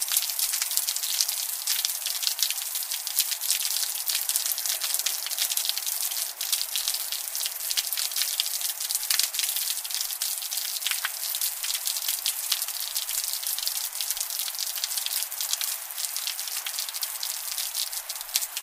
AMBIENT - Rain Spilling from Drainpipe(LOOP)
Rain pouring from a drainpipe onto pavement.
Steady splattering and dripping of rainwater.
Some medium backround hiss of the city can be heard.